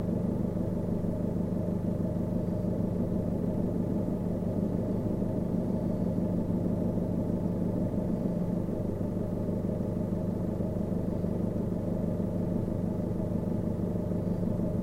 Vehicle Car Peugeot 308 Idle Interior Loop Mono
Motor Idle Interior - Peugeot 308 - Loop.
Gear: Rode NTG4+.